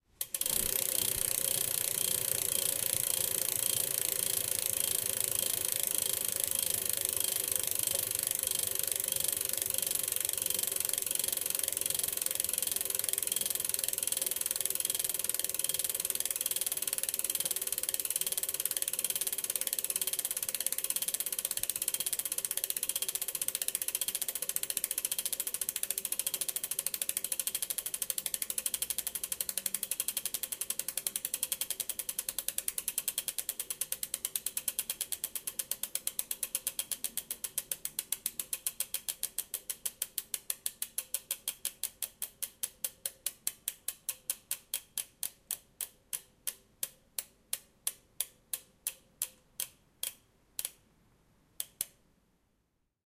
Bycycle rattle
bicycle backwheel turning until stops, recorded with Zoom H2 built-in micro